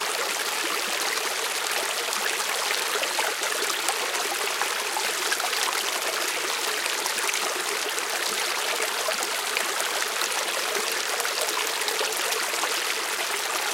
Creek in Glacier Park, Montana, USA
field-recording, nature, water